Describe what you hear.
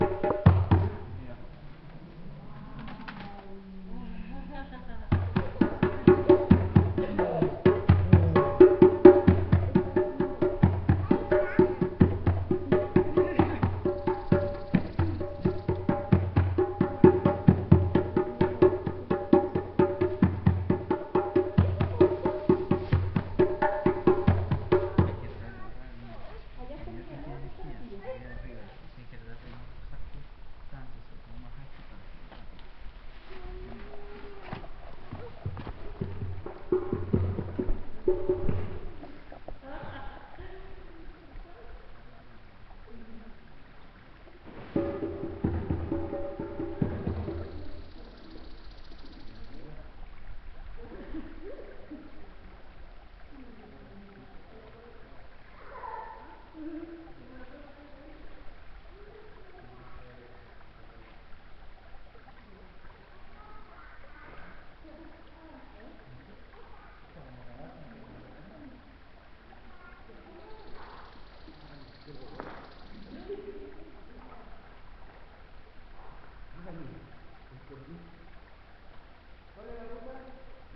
drums, voices spanish, children, river, birds and natural eco
H4zoom recodered (place: Quebrada de Here, Toconao, Andes Mountain on North of Chile) processed with Sound Studio (normalize to 0db).
I Work in documentary and fiction films in Chile.
These small sound clips I made while accompanying friends to make hands-free climbing on a small creek near Toconao south of San Pedro de Atacama. They like to play drums to quench anxiety. Was in October 2012.
voices, percussive, ambient, drums, acoustic